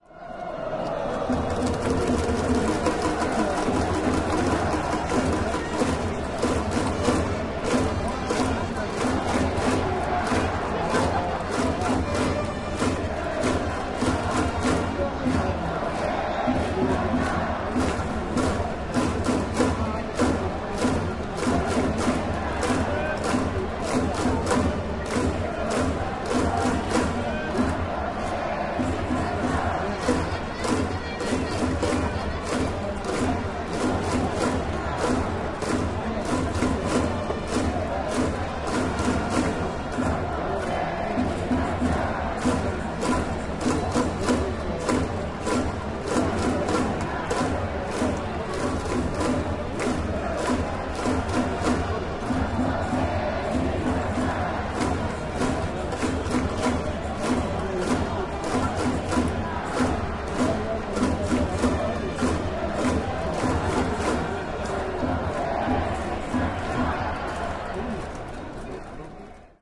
nagoya-baseballregion 10
Nagoya Dome 14.07.2013, baseball match Dragons vs Giants. Recorded with internal mics of a Sony PCM-M10
Soundscape, Crowd, Baseball, Ambient